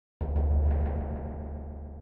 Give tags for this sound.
beat
drum
odd
sample
strange
timpani

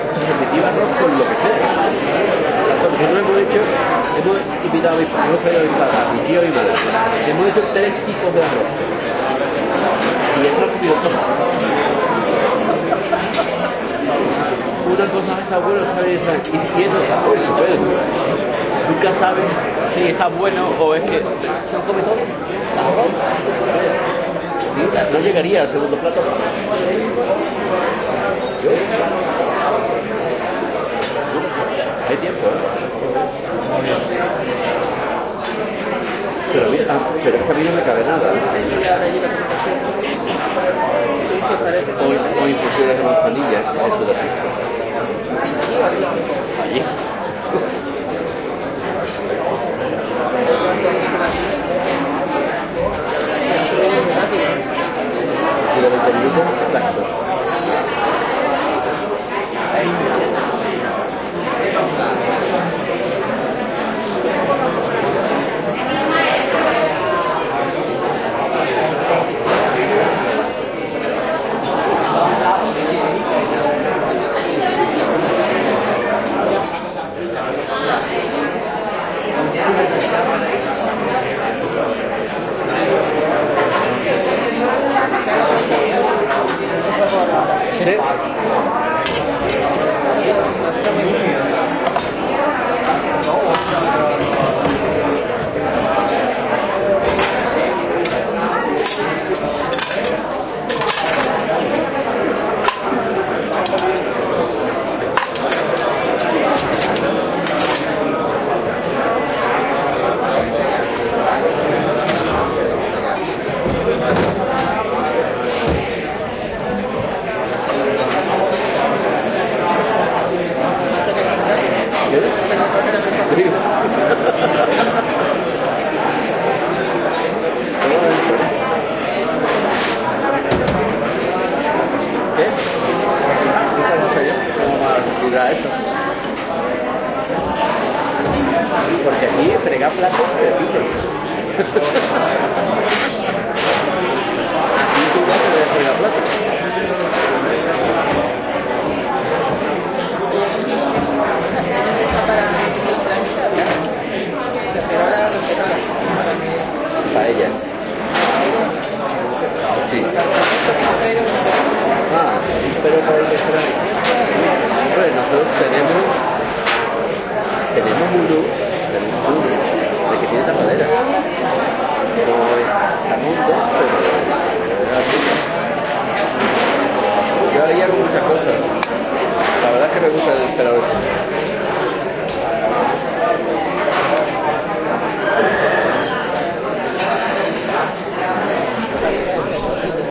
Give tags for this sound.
ambiance
bar
conversation
field-recording
madrid
restaurant
spanish
voice

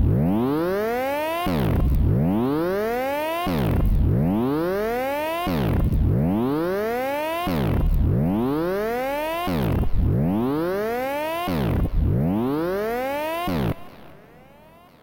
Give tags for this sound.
squelch
alarm
synthesizer
waldorf

